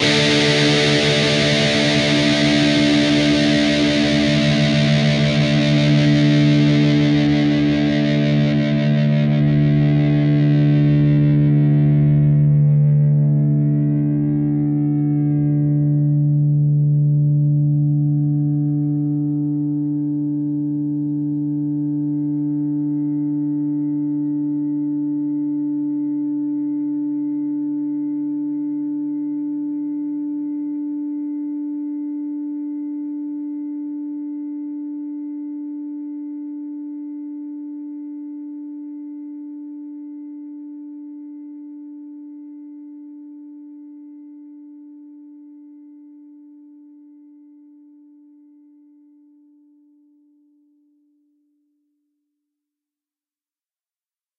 Dist Chr D5th
Standard D 5th chord. A (5th) string 5th fret, D (4th) string 7th fret, G (3rd) string, 7th fret. Down strum.
chords, distorted, distorted-guitar, distortion, guitar, guitar-chords, rhythm, rhythm-guitar